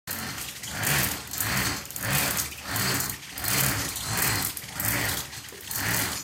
Sound of a bike
bicicleta, bike, cycle, ride